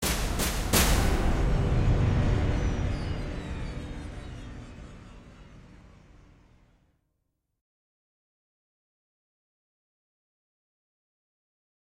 A dramatic suspense cue for action,fantasy,science fiction, or cartoon